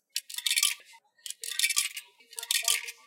This sound is about coins falling inside vending-machine.
Technical: we used Zoom h4n for capture this sound and also we compression with noise reduction.